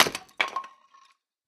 Wood - Chopping 1
Wood chopped once with an axe.
2beat, 80bpm, axe, hit, impact, one-shot, tools, wood, woodwork